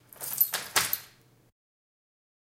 Door locking with keys
door, key, keys, lock, locking, sfx, unlock